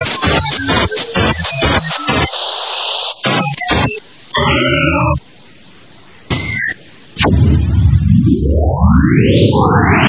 It was a selfie with my cat Alaska, altered in photopaper and p5.